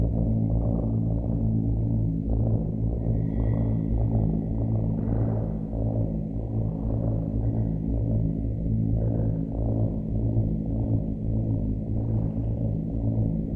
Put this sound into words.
kerri-cat1o-loopable
This is fully loopable version of it (no fade in/out needed). The sound is 1 octave higher than the original.
animal, cat, loop, purr, remix, texture